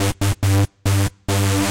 140 Wub Grubsynth 04
loops, free, guitar, filter, sounds, drums